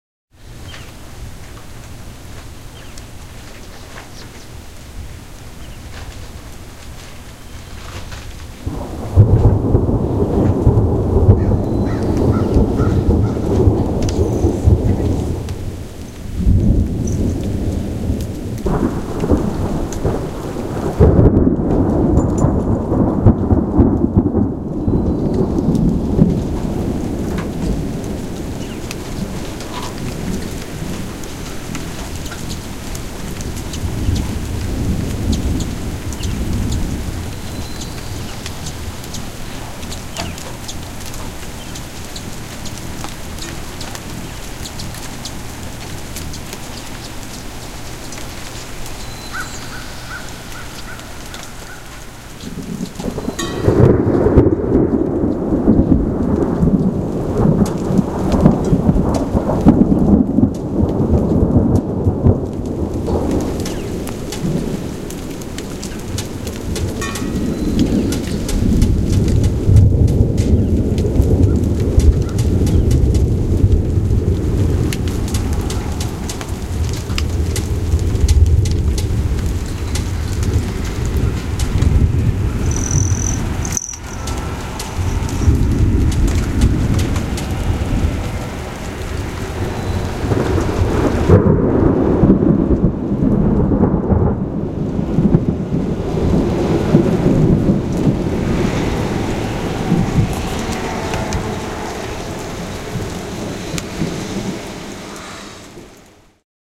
br Woodsy Ambiance3
Colorado Mountains at 10,000 ft: Crows, Hummingbirds, thunder, storms. MORE THUNDER.
thunder, hummingbirds